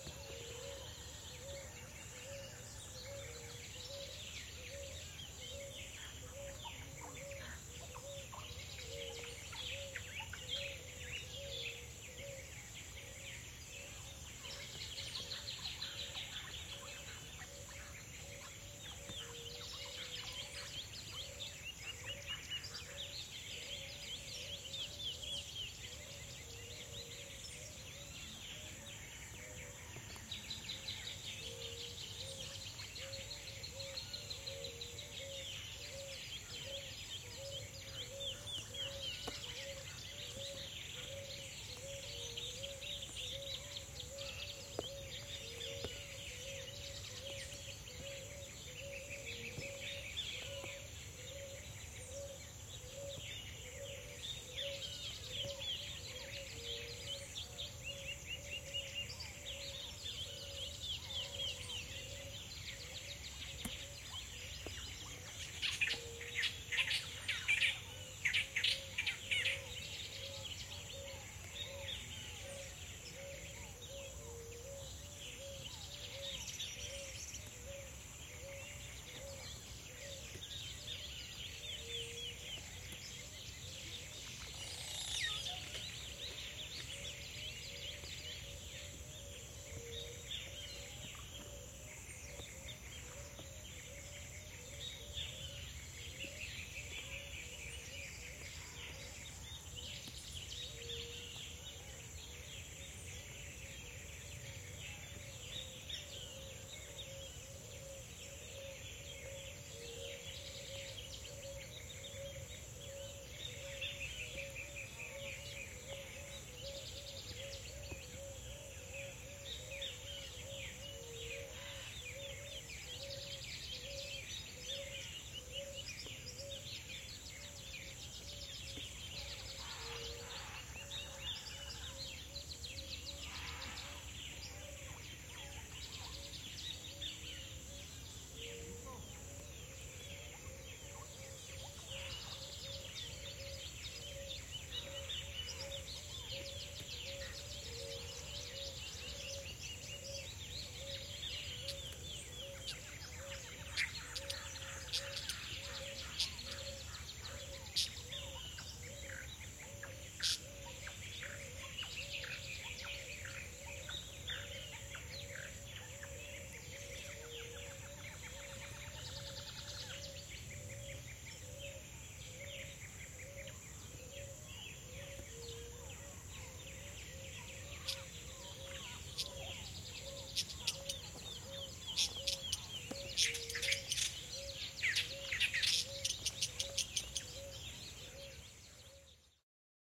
LlanosVenezolanos Amanecer Aves
field-recording, tropical-birds, farm, exotic, venezuelan